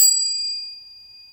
23rd chime on a mark tree with 23 chimes